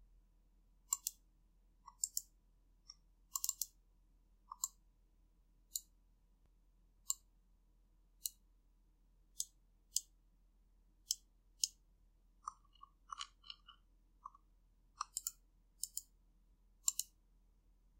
A mouse being clicked